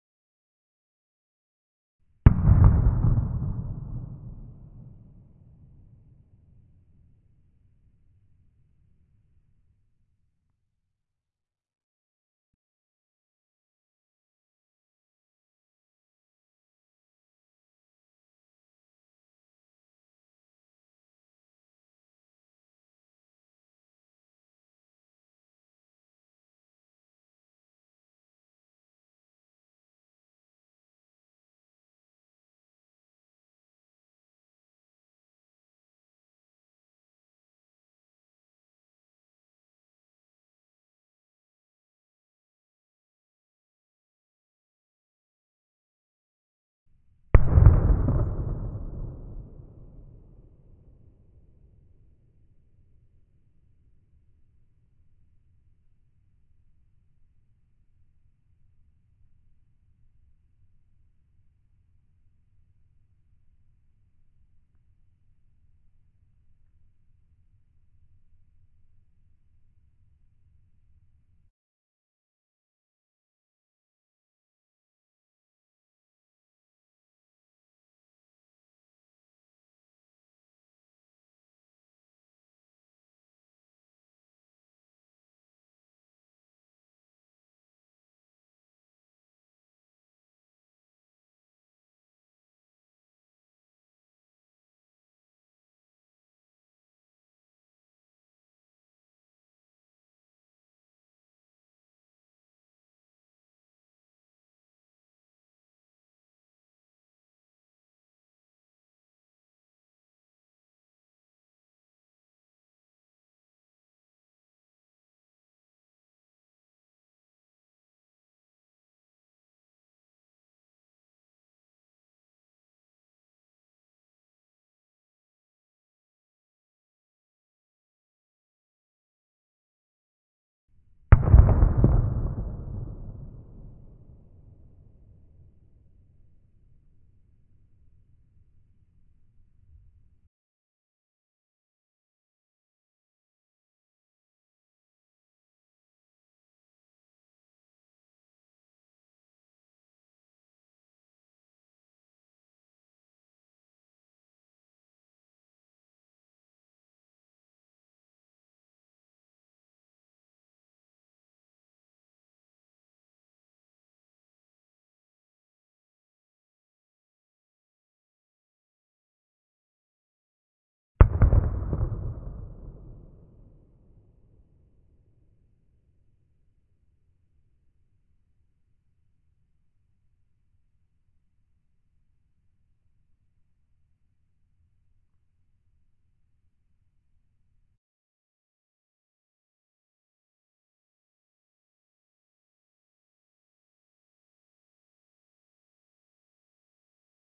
Happy New Year! this was a recording of fireworks, slowed and pitched down, no artificial reverb to allow anyone to fit this to their scene